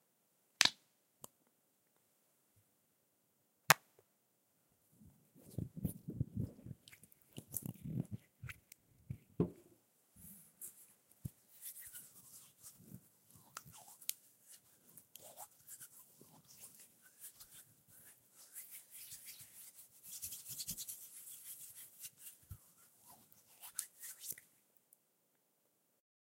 Hand Gel Rubbing
antibacterial, bizarre, gel, handling, hands, holding, rub, rubbing, soap